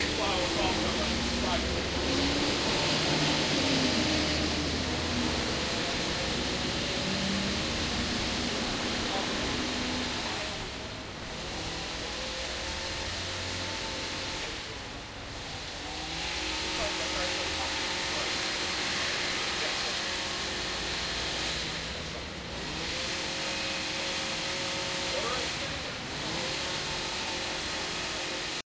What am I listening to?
philadelphia cvsbroadst outside
Outside the CVS on Broad St by the stadiums in Philadelphia recorded with DS-40 and edited in Wavosaur.
city, field-recording, philadelphia